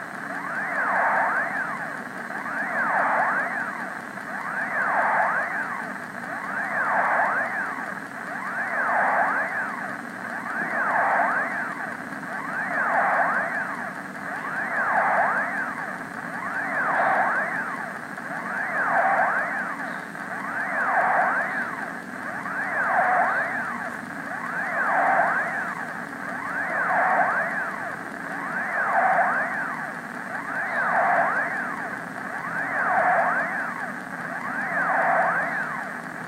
20161226 space.oddity.mono
Close-up mono recording of the noise my vacuum cleaner (iRobot Roomba 660) makes while charging battery. Primo EM172 capsules into FEL Microphone Amplifier BMA2, PCM-M10 recorder.
alien, electronic, future, futuristic, loop, machine, sci-fi, space, weird